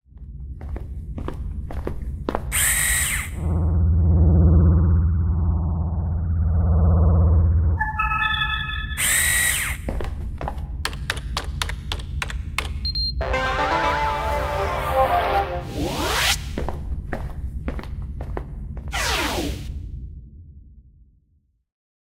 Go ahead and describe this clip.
The sound of the security clearance for the spaceship's control room.